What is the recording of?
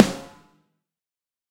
CPGNB SNARE 001
Combination of many real and sampled snare drums, processed.
drum, processed